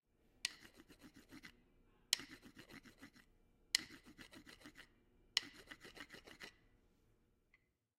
Rubbing and tapping drum sticks together.